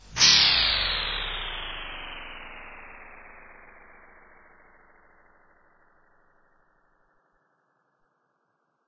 Artificial Simulated Space Sound 17
Artificial Simulated Space Sound
Created with Audacity by processing natural ambient sound recordings
ambient, drone, experimental, artificial, effect, fx, alien, scifi, soundscape, spaceship, space, pad, ufo, spacecraft, sci-fi, atmosphere